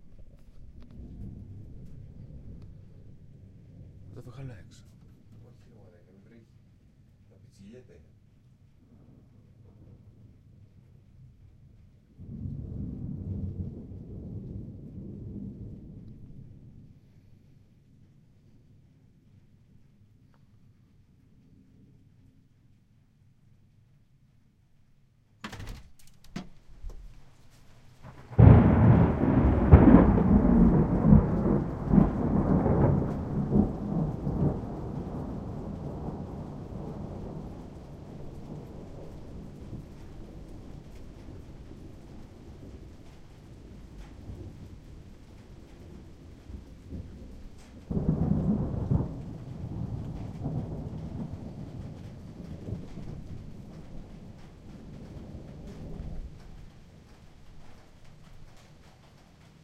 the storm
pelion greece "field recording" forest storm